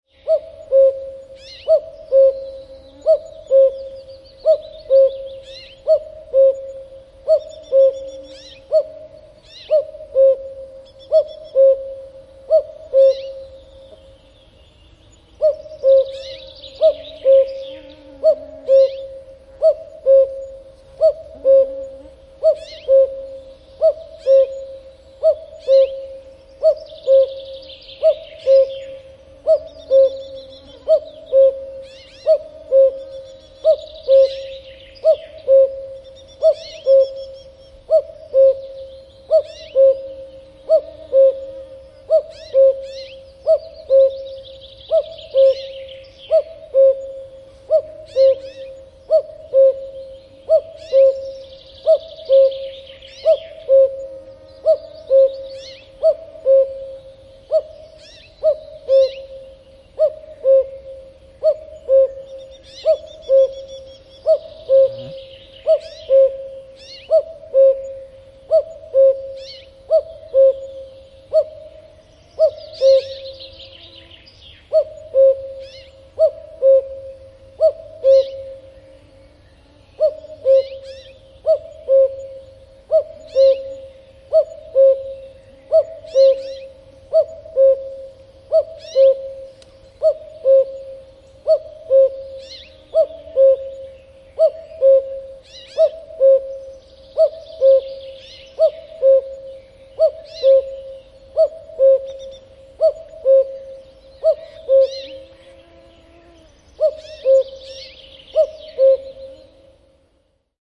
Käki kukkuu / Cuckoo cuckooing in the forest, some insects and small birds in the bg (Cuculus canorus)
Käki kukkuu metsässä, kesä. Joitain pikkulintuja ja hyönteisiä taustalla. (Cuculus canorus)
Paikka/Place: Suomi / Finland / Kitee, Kesälahti
Aika/Date: 14.06.1992